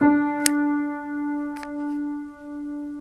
piano note regular D